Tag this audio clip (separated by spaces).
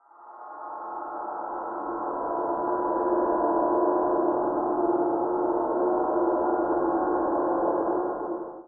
alert change signal whizz